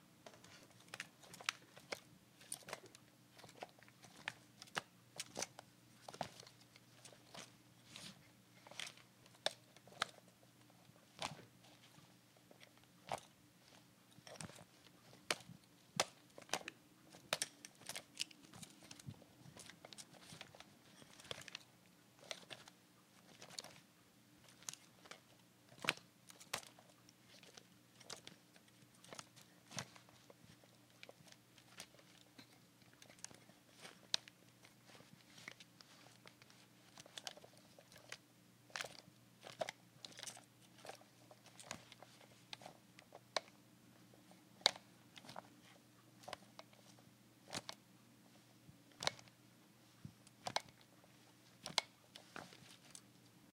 Foley / handling sounds of a vintage film camera (Minolta X-700)
camcorder, camera, foley, handling, manual-focus, manual-zoom, movement